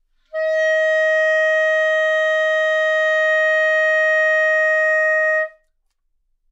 Part of the Good-sounds dataset of monophonic instrumental sounds.
instrument::clarinet
note::Dsharp
octave::5
midi note::63
good-sounds-id::609
clarinet, Dsharp5